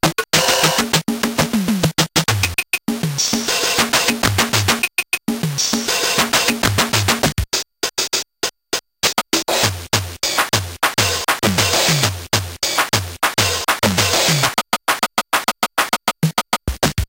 Random Drums 1
8bit, nanoloop, drumloops, glitch, videogame, chiptunes, gameboy, cheap